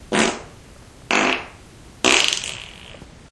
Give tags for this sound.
noise
explosion
space
flatulation
fart
aliens
poot
race
gas
flatulence

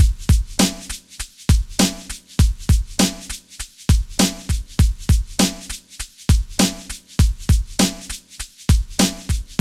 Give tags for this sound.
beat
downtempo
drum
drumloop
loop

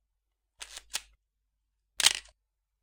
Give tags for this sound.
camera
mechanical
click
shutter
me
pentax
load

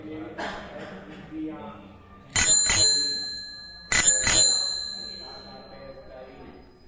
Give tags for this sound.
bell
bicycle
bike
cycle
horn
human

